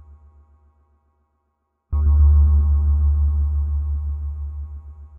Spring Boing
A sound similar to a spring vibrating created using AniMoog for iOS.